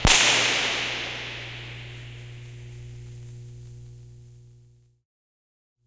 Electric Hit
Sharp electric impact.
electricity, metallic, snap